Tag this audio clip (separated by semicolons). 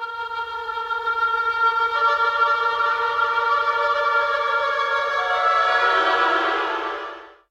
sequence; transformation; oboe